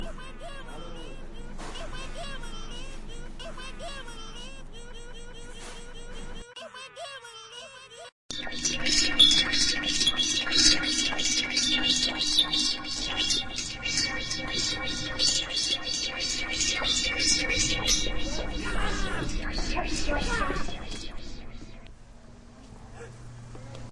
SonicPostcard HD Tom&Joe
Here is Tom & Joe's composition. It is more like an abstract piece of sound art than a sonic postcard. They have used quite a mixture of sounds and lots of effects. Have a listen and see what you think. Can you identify the sounds?